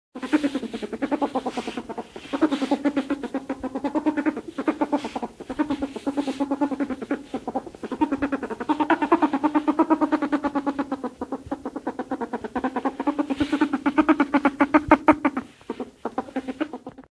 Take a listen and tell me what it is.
Recorded by cell. Sound of playing ferret.
animal, Ferret, happy, playing